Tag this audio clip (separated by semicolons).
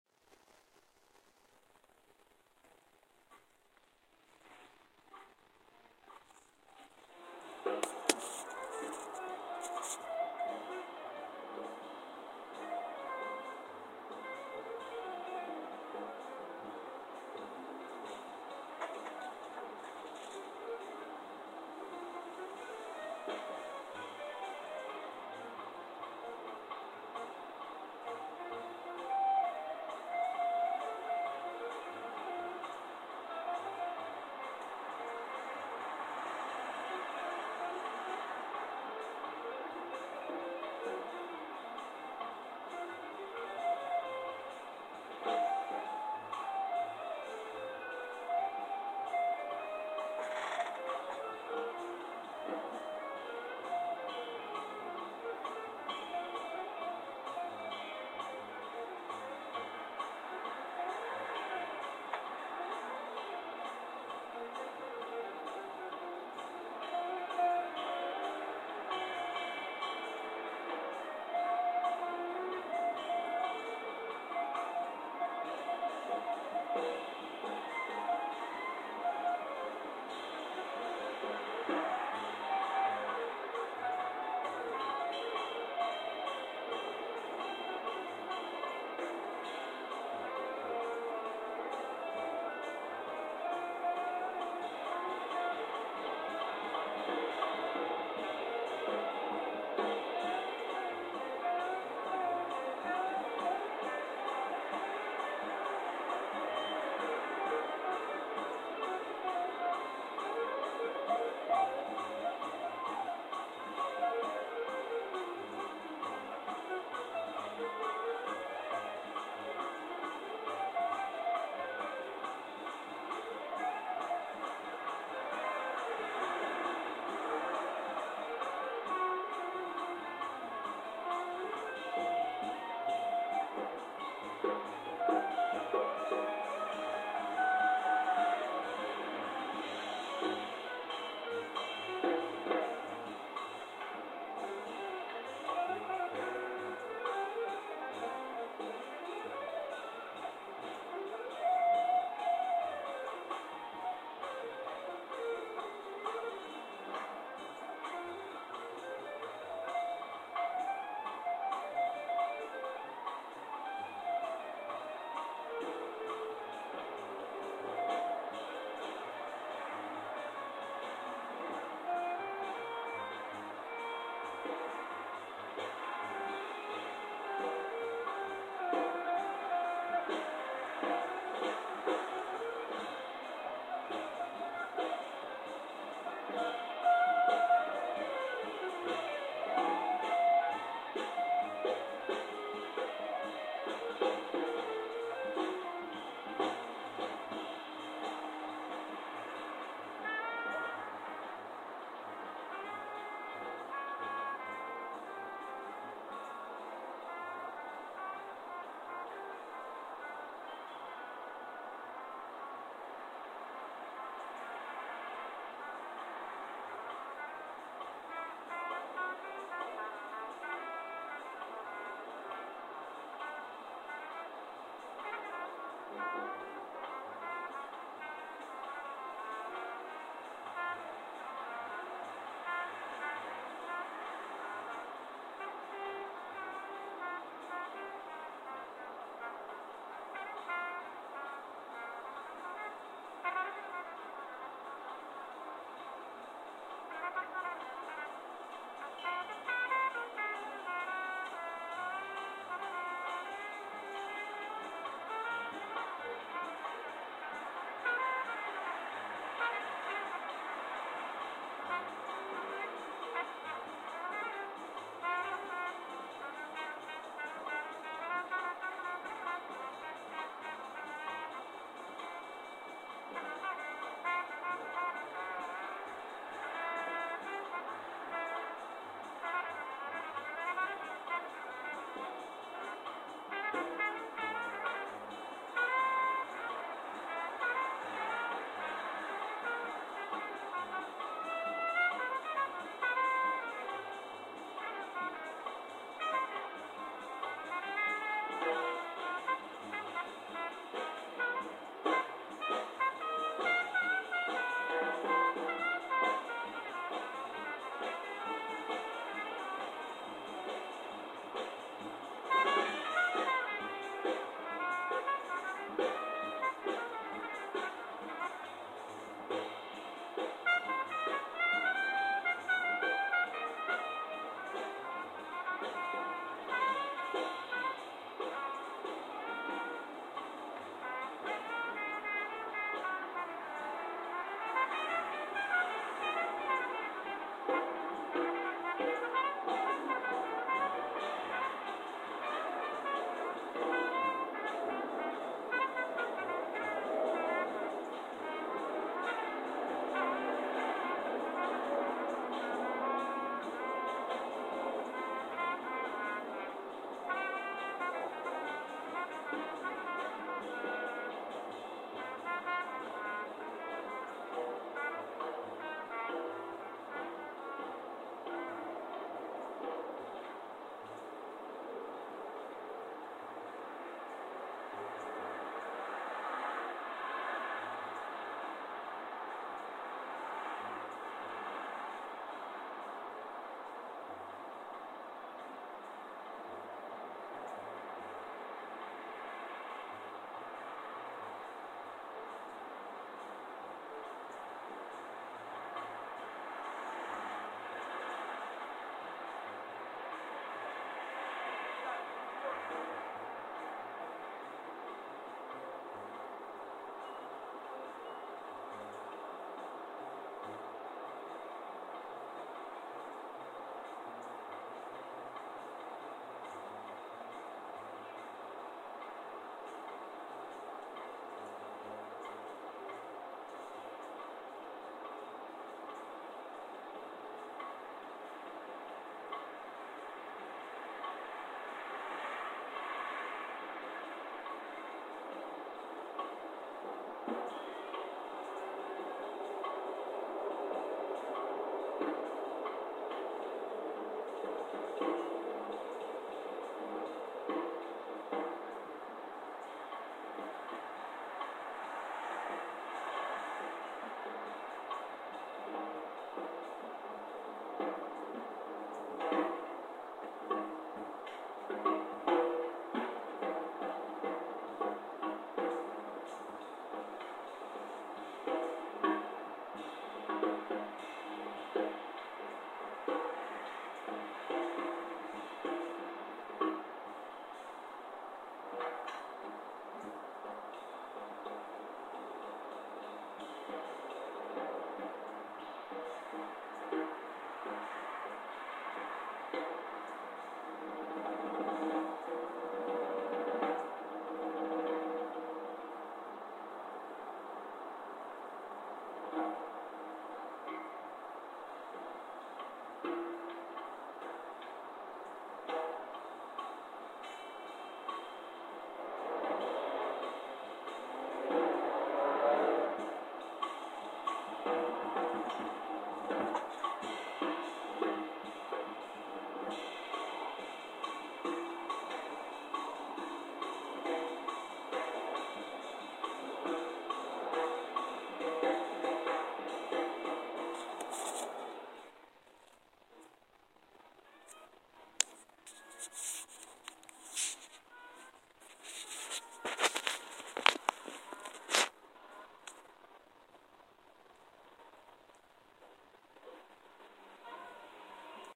city; field-recording; Forest-park-il; free-jazz; jazz-rock; live-music; street; traffic